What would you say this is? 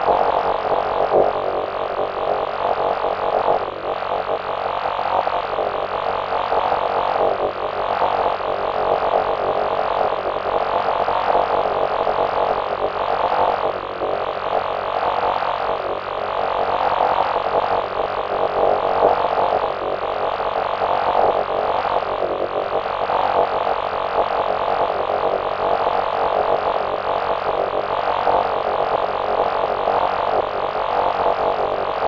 Energy drone [loop] 01 unstable
Created using an A-100 analogue modular synthesizer.
Recorded and edited in Cubase 6.5.
It's always nice to hear what projects you use these sounds for.
50s, 60s, ambience, city, classic, conduit, drone, electronic, energy, field, loop, machine, retro, sci-fi, science-fiction, scifi, shield, space, spaceship, synthetic